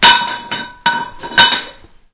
Various sounds from around my kitchen this one being a china breadbin
breadbin, kitchen